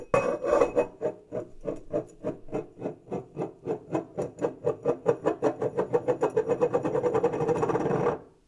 Glass - Spinning 04
A glass spinning until it stops - wooden surface - interior recording - Mono.
Recorded in 2001
Tascam DAT DA-P1 recorder + Senheiser MKH40 Microphone.
beerglass, glass, spinning